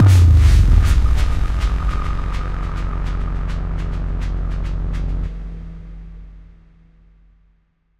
Cinematic Impact 03
boom, boomer, cinematic, design, effect, film, Free, game, hit, Impact, inception, intro, movie, Tension, title, trailer, video